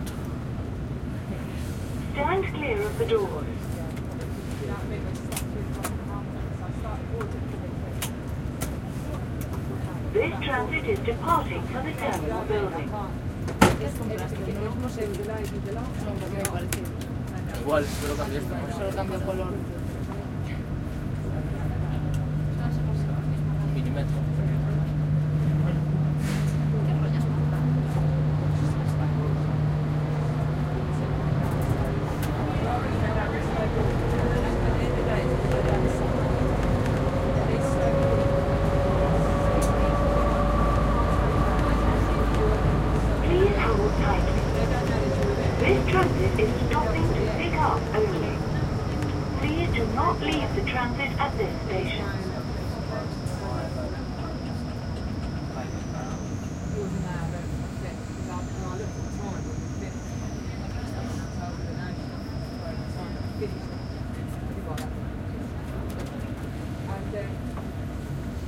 Recording made on 15th feb 2013, with Zoom H4n X/y 120º integrated mics.
Hi-pass filtered @ 80Hz. No more processing
Ambience from transit's interior from international flight to main building on Standsted Airport